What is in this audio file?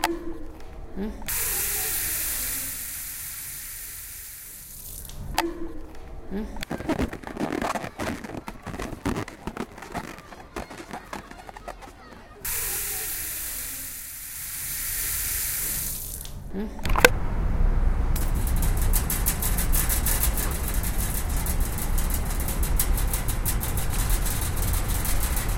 Sonic Postcard AMSP Felipe Ashley
AusiasMarch, Barcelona, CityRings